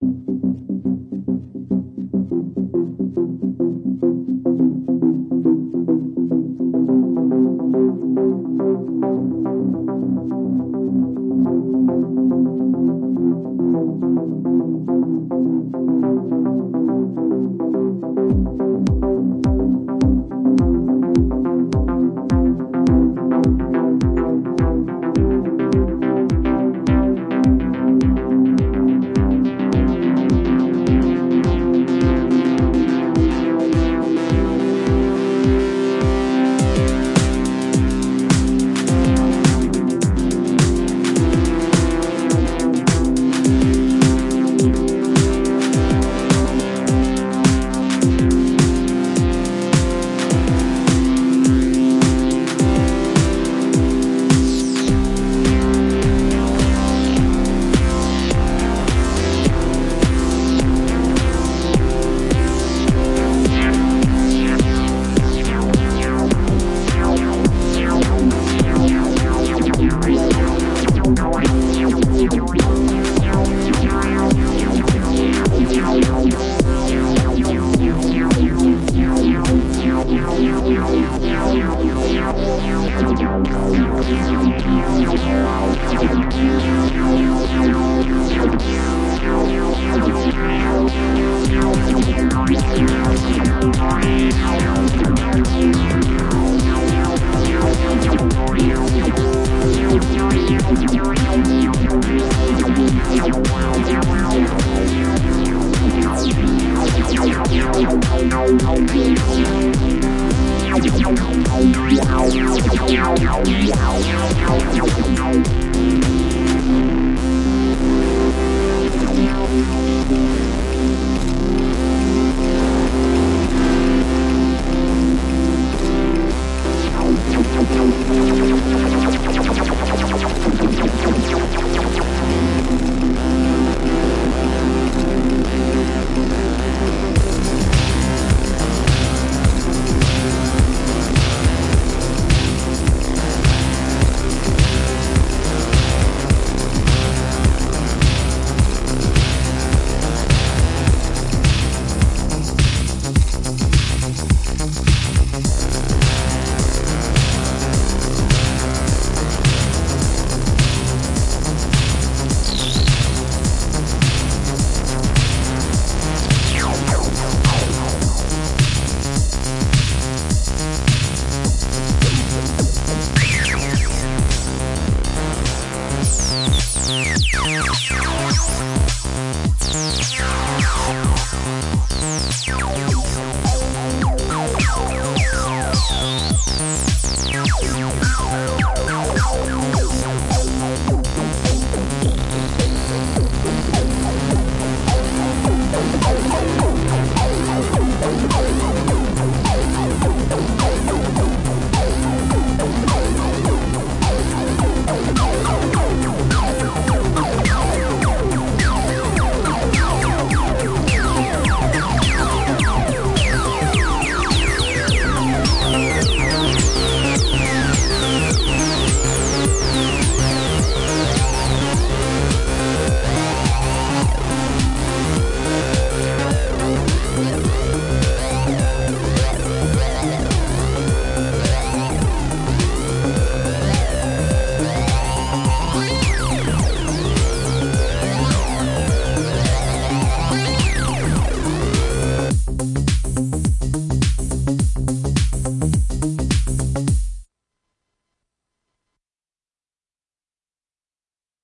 Live Recording Using:
Arturia Minibrute
Arturia Drumbrute
Korg M3
Novation Circuit
electro, hardware, electronic, techno, arturia, korg, experiemental, synth, novation, live, dance, house, synthwave, edm, trance, digital, analog, loop